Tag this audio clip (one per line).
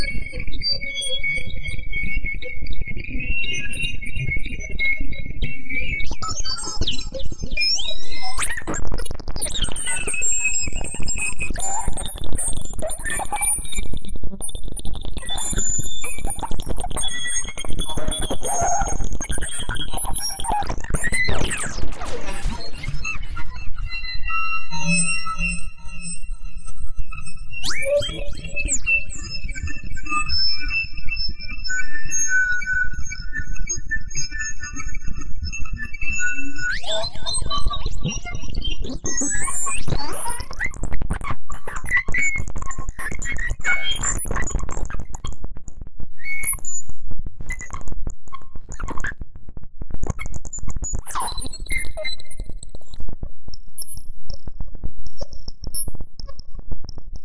hifrequency
sweeps
skewell